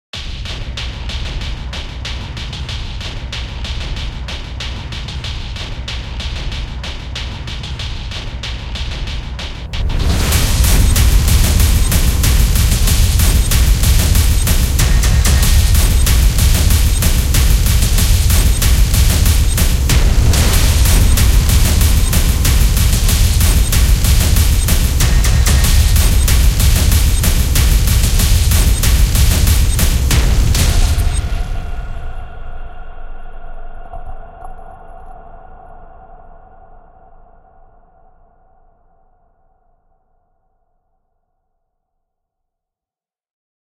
Apocalypse Runner (perc)
NI's Damage kit, and Evolve Mutations sequenced in Maschine with added effects, mainly being pitch env. saturation and reverb.
Action, Apocalypse, Cinematic, Dark, Dramatic, Film, Orchestral, Percussion, Simple